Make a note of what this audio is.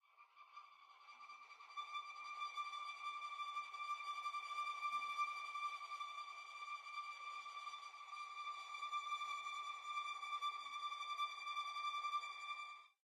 One-shot from Versilian Studios Chamber Orchestra 2: Community Edition sampling project.
Instrument family: Strings
Instrument: Viola Section
Articulation: tremolo
Note: D6
Midi note: 86
Midi velocity (center): 31
Microphone: 2x Rode NT1-A spaced pair, sE2200aII close
Performer: Brendan Klippel, Jenny Frantz, Dan Lay, Gerson Martinez
viola-section, vsco-2, tremolo, multisample, midi-note-86